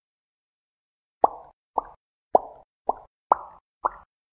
Pops Different Tones
Recorded With an Iphone 8+ with the preinstalled app voice notes and mastered, chaged tone, eq and noise reducted with audacity,
edit, rip, file, thing, saw, unprocessed, glitches, dub-step, wire, hop, dub, step, edison, flstudio, audacity, distortion, glitch-hop, white, pop